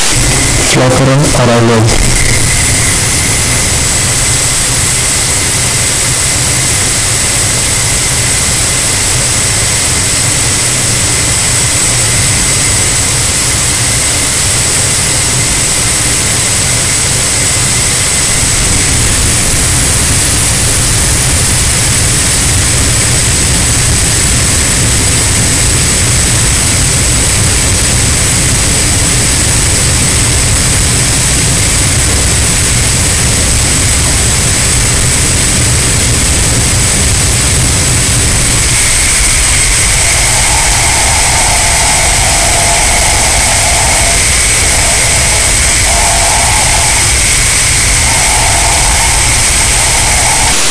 I recorded my stove.
stove, coocking-flame, fire